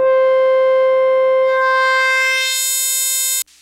relatively un-modulated tone with small sweep at tail..